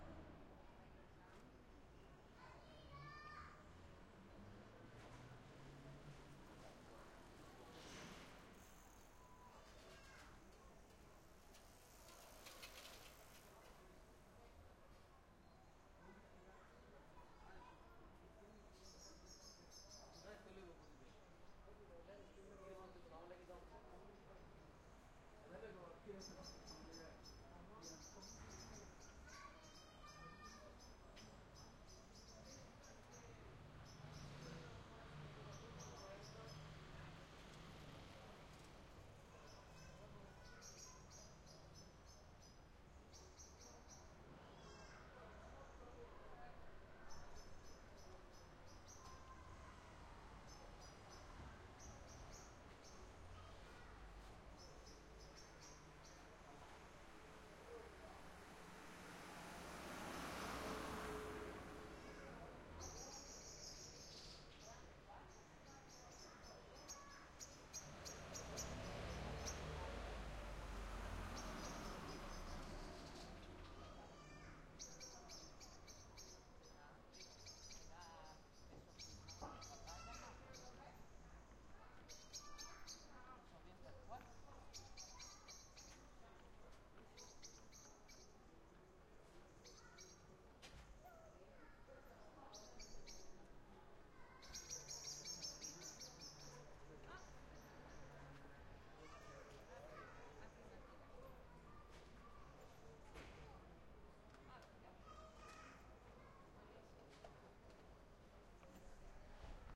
081009 03 monfalcone soundscape bicycle people road
small soundscape from monfalcone, italy